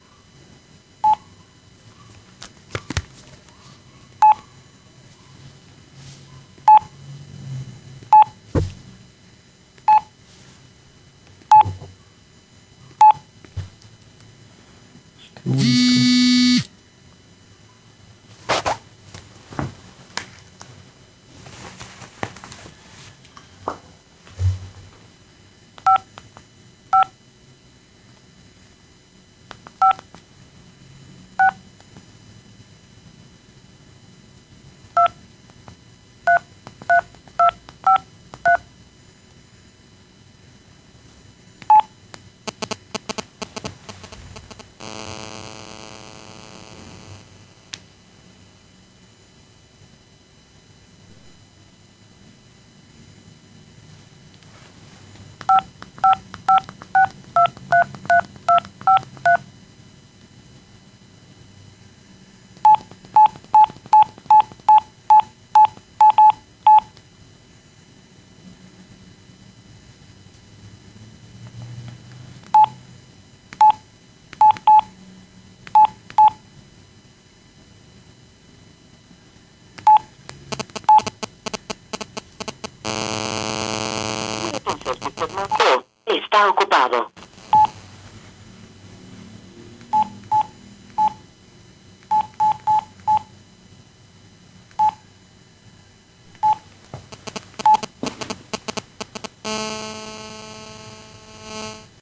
teclas e interferencia celular
grabación de un celular, sus teclas e interferencia. recording a phone, your keys and interference.
keys, celular, interferencia, cellphone, teclas